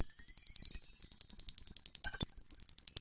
Glassy sounding glitch loop.